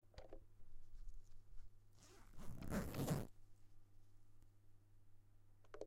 This is the sound of a zip opening and closing
clothing, zip